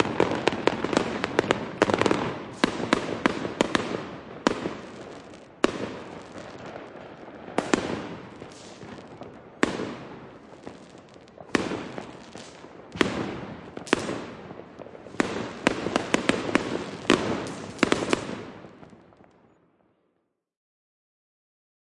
Outdoors fireworks recorded at medium distance with natural close buildings echoes.
Gear:
Zoom H6
2 Oktava MK 012 in ORTF setting
Thanks!